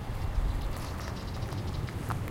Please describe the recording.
Sound of a Greenfinch, in Delta of Llobregat. Recorded with a Zoom H1 recorder.
bird, Deltasona, verdum, el-prat